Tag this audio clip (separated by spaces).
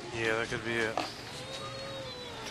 field-recording loop ocean-city